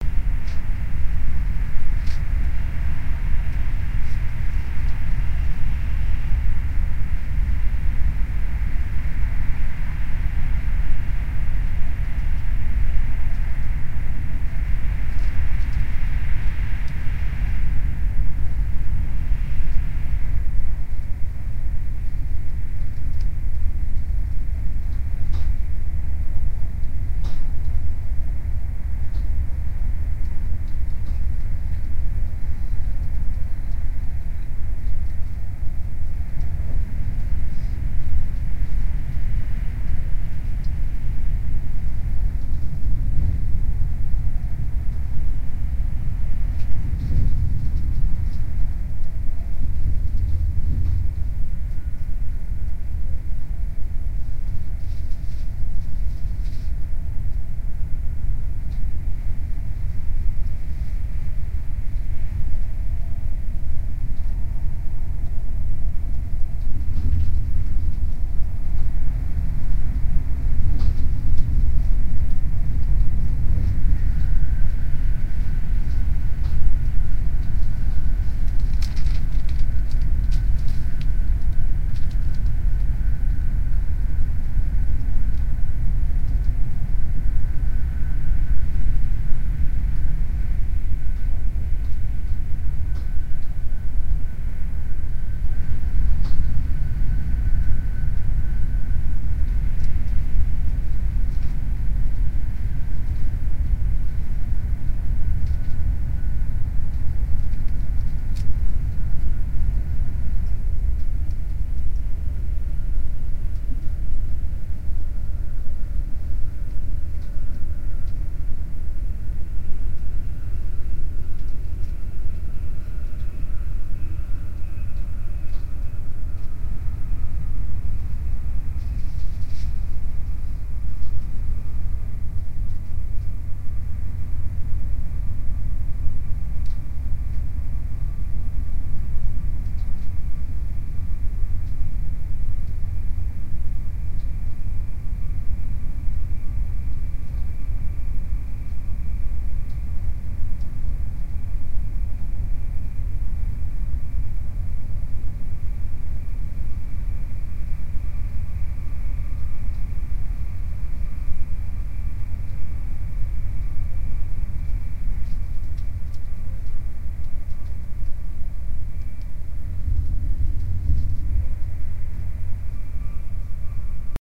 On The Train
Here are a few moments of a train journey I did the other day. It was
recorded on a train from Stuttgart to Frankfurt in Germany.